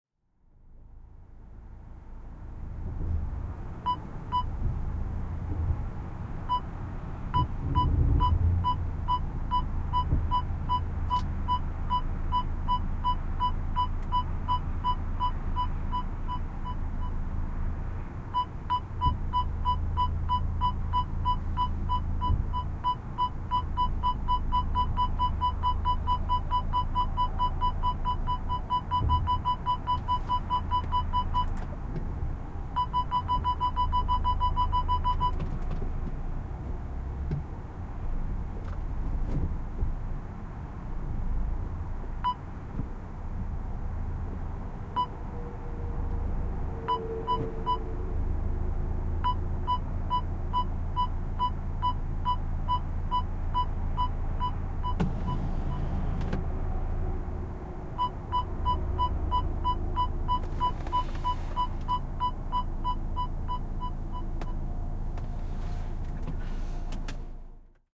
Parking Sensors of Audi A5 Cabrio 2.0 TFSi Model 2017, recorder with Zoom H4n internal mikes.